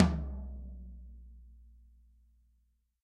X-Act heavy metal drum kit==========================Drum kit: Tama RockstarSnare: Mapex mapleCymbals: ZildjianAll were recorded in studio with a Sennheiser e835 microphone plugged into a Roland Juno-G synthesizer. Cymbals need some 15kHz EQ increase because of the dynamic microphone's treble roll-off. Each of the Battery's cells can accept stacked multi-samples, and the kit can be played through an electronic drum kit through MIDI.
tama, metal, drum, kit, rockstar, heavy, tom